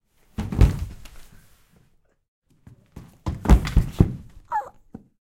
SFX - person falling on wooden floor
Two variations of a person tripping and falling on a wooden floor.
Recorded for a sound design class prac using a Zoom H6 recorder with XY capsule.
foot, falling, wooden-floor, trip, collapse, steps, ground, fall, squeak, OWI, body-fall, close-perspective